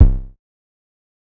C Kicks - Short C Kick
Square wave > Filter > Pitch Mod > Hard Compression for Transients